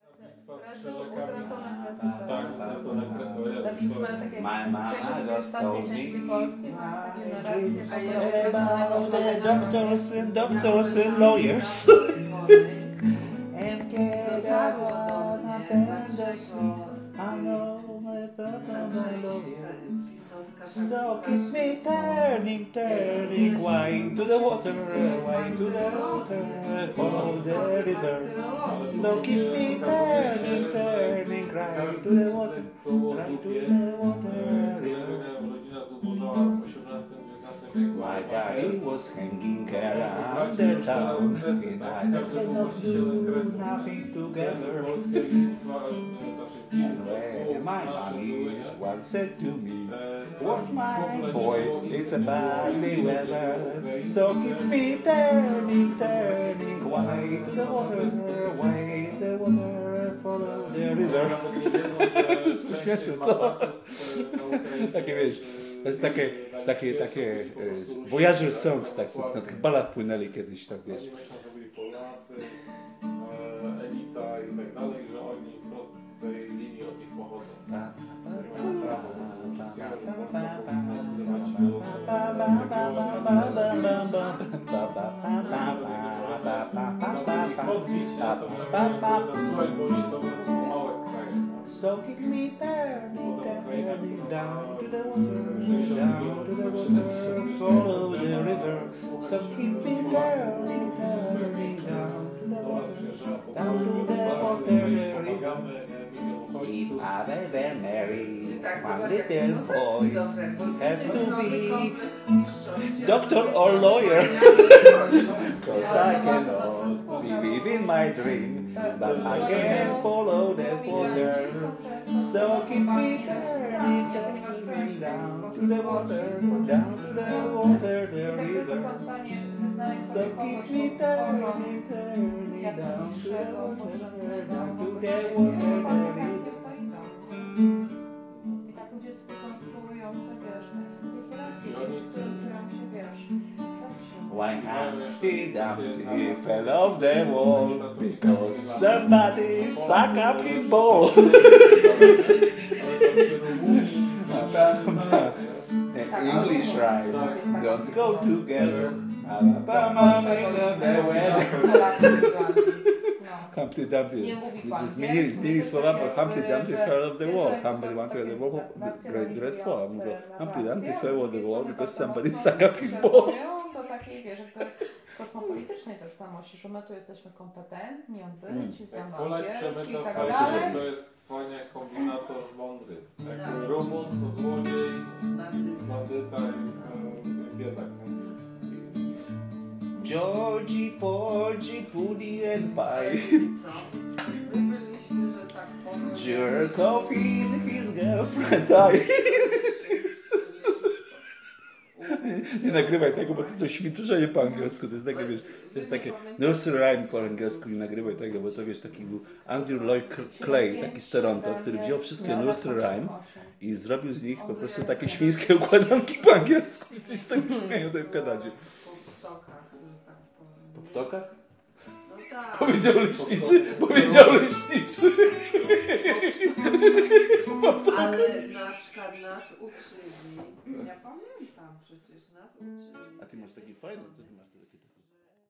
polish song ottawa 050517 001
05.05.2017: song in Polish singing by migrants. During the party in Ottawa. Recorded with authorisation.
Canada, music, song, voice